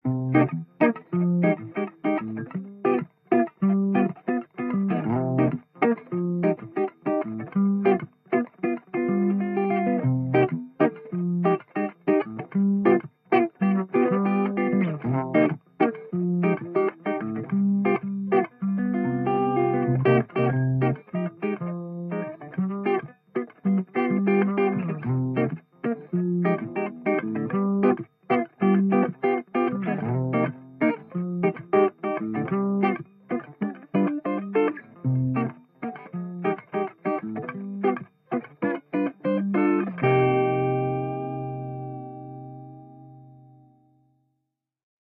Latin Soul Acid Guitar - 96bpm
96bpm, ambient, cool, fender, funky, groovy, guitar, improvised, latin, lofi, loop, oldtape, quantized, rhythmic, salsa, soul, vintage